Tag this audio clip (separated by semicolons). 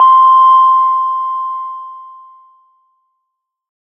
110; acid; blip; bounce; bpm; club; dance; dark; effect; electro; electronic; glitch; glitch-hop; hardcore; house; lead; noise; porn-core; processed; random; rave; resonance; sci-fi; sound; synth; synthesizer; techno; trance